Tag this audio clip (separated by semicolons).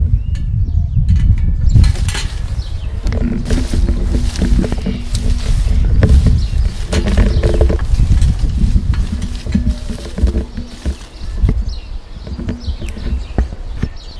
france; saint-guinoux; sonicpostcards